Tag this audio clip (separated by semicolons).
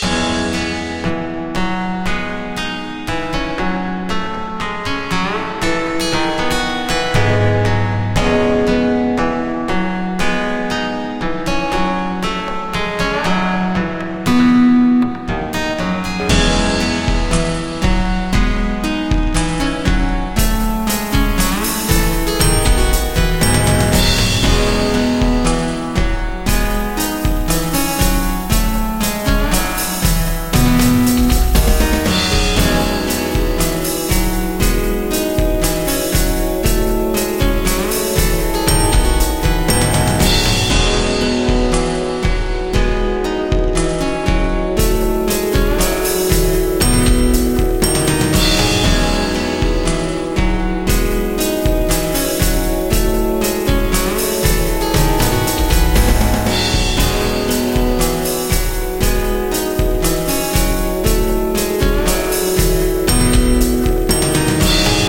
BPM; Music; 118; Bass; E-Major